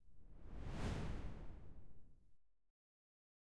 whoosh long low
A simple whoosh effect. Long and low.
pass-by, whoosh, air, gust, wind, fly-by, swoosh, swish, fast